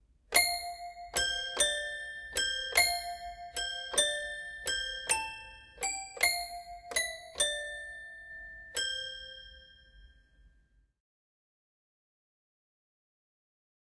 jack jill toy piano
The tune "Jack and Jill" played on an antique toy piano.
Sony ECM-99 stereo microphone to SonyMD (MZ-N707)